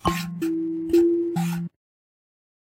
kalimba brushing
a short clip of myself playing the kalimba while simultaneously brushing my hand over it's textured hand carved wood.